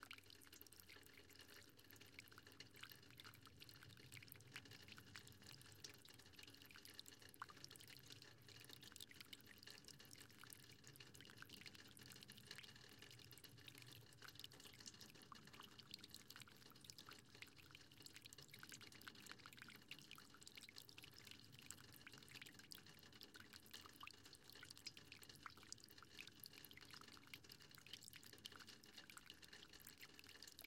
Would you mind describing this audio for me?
Rain Gutter Drain Rear
Rain water from a metal rain gutter emptying onto a concrete slab. Raw sound. Sound recorded with a Zoom H2 audio recorder.